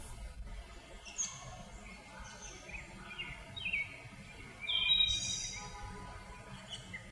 A short bird sounds clip
A short clip of bird sounds recorded outside of Philadelphia in May 2020.
spring, birds, birdsong, field-recording, nature, bird